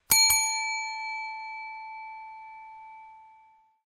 reception bell1
sound of a normal reception bell, rang twice
bell, hotel, reception, ring